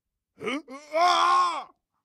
Shout.
Recorded for some short movies.